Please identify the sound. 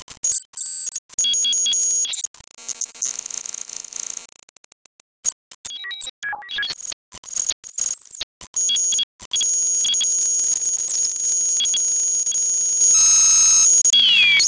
a sound forge experiment gone awry: this is the mutant offspring